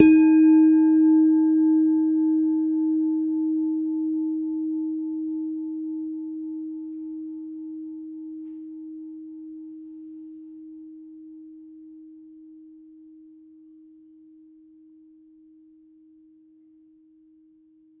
Semi tuned bell tones. All tones are derived from one bell.